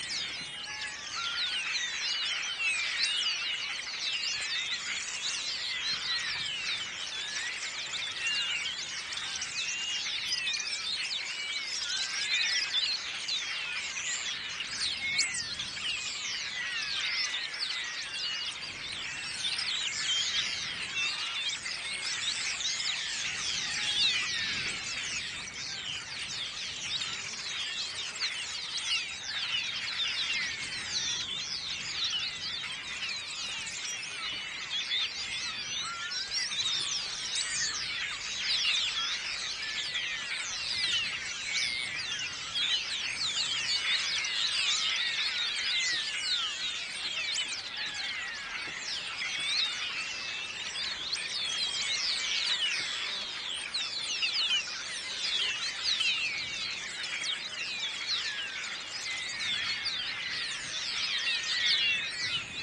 ambiance near a Visitor center at Doñana wetlands, south Spain. Mostly bird calls (sparrows, thrushes...).Soundman OKM and Sony MZ-N10 MD